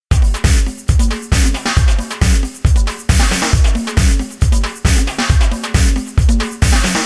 killdacop drums 136 21
Segmented group of loops from a self programmed drums.Processed and mixed with some effects.From the song Kill the cop
rock, drum